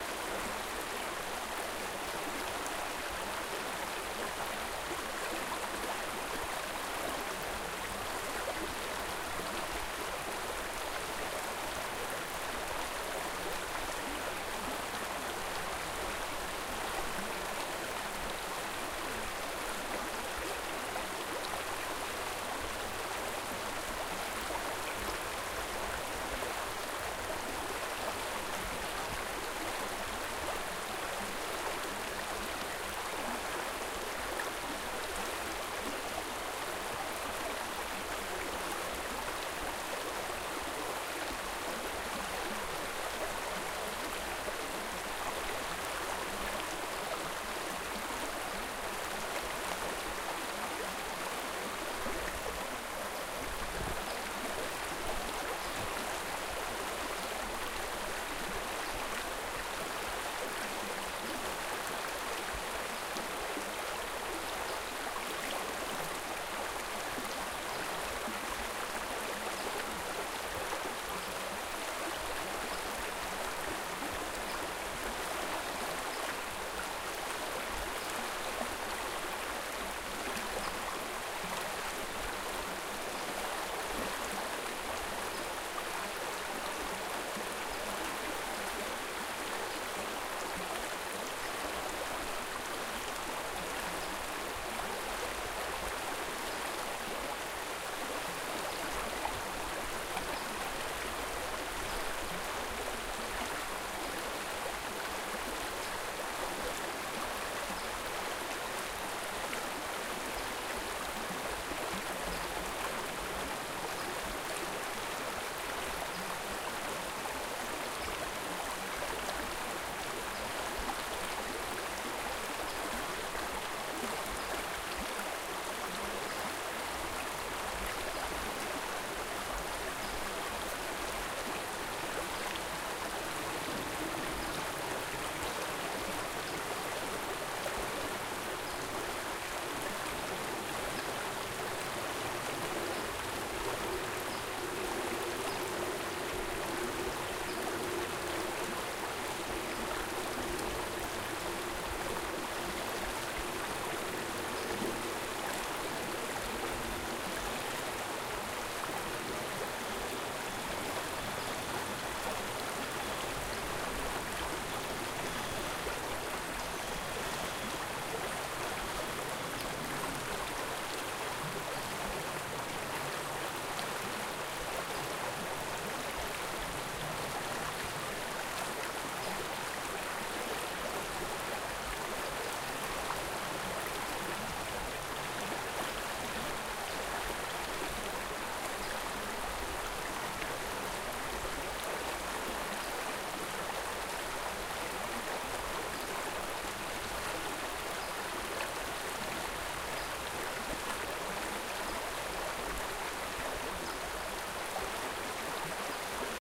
Small Rocks in a Creek
A part of the creek that was flowing a little slower over some smaller rocks. Quite nice sounding. Using a Zoom H4N.
ambience, calm, campsite, creek, flow, light, nature, outdoors, rocks, water